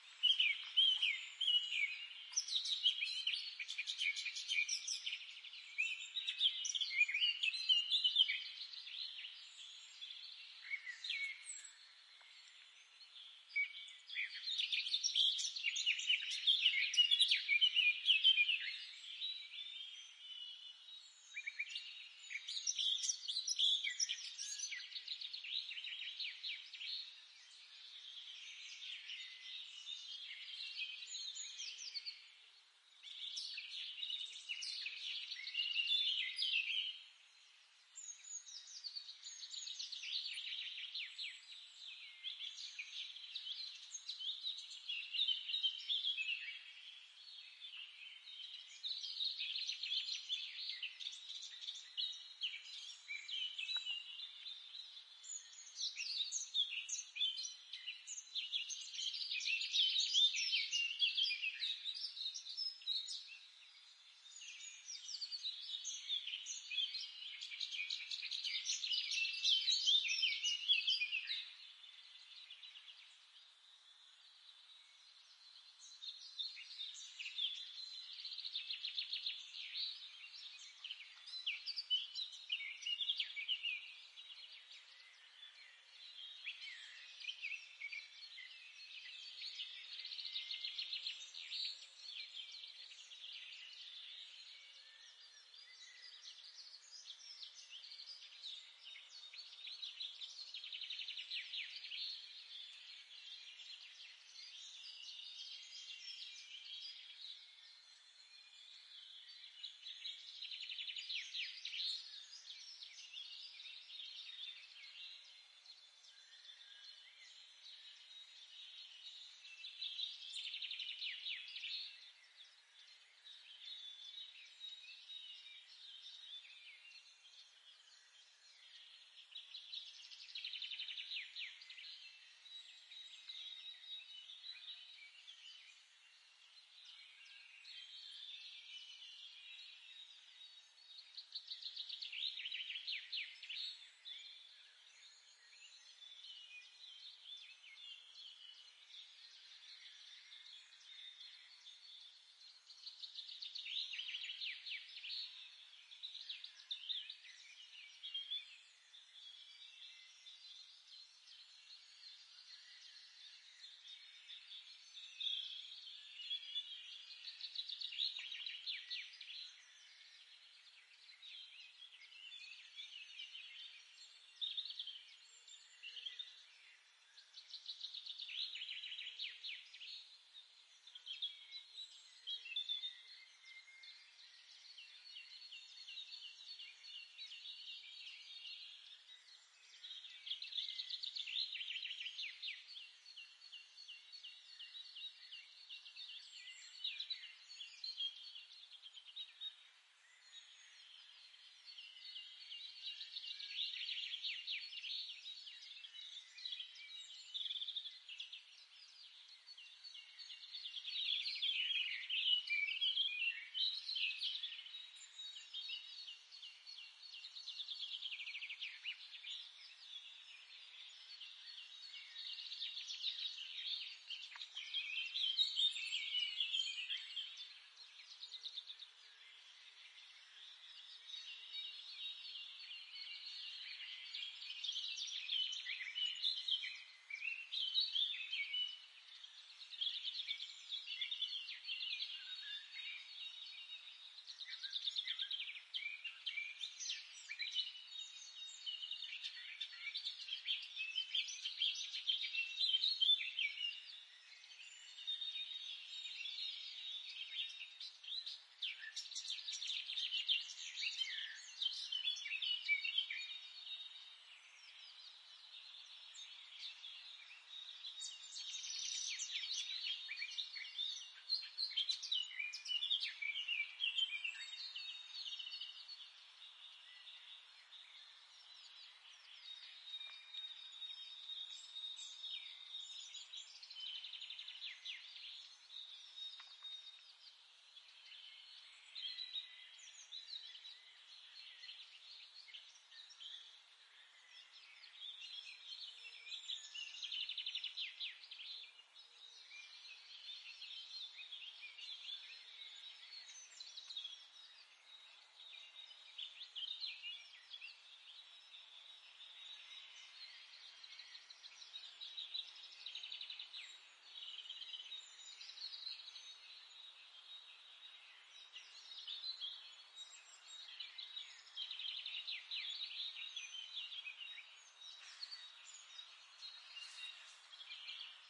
Binaural Birds LOOP 1
A binaural recording of birds singing in the woods. Recorded at the 'Utrechtse heuvelrug' in the Netherlands. Gear used: Roland CS-10em binaural microphones, Zoom H4n field recorder. Processing: slight noise reduction (9dB) using iZotope RX7, high pass filter to remove unwanted rumble (Cubase 7.5), made into a loop.
loop, Roland, CS-10em, stereo, binaural, ambient, field-recording, spring, birdsong, H4n, birds, bird, tree, nature, Zoom, forest